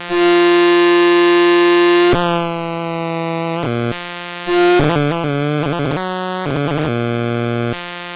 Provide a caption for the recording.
APC-Drunk556
APC, diy, Lo-Fi, noise